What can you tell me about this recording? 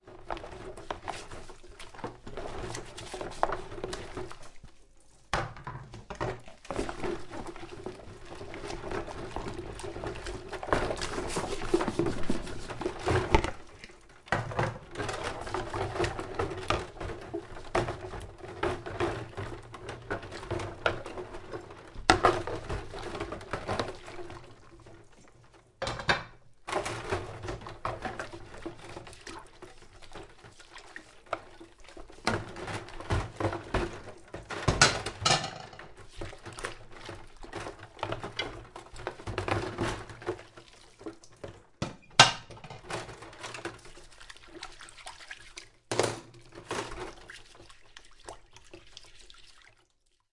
afwas01-def01
washing up in a plastic bowl. double mono. recorded on MD with a rode nt3.
kitchen, washing-up, dishes